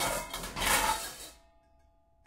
pots n pans 08
pots and pans banging around in a kitchen
recorded on 10 September 2009 using a Zoom H4 recorder
pots, rummaging, pans, kitchen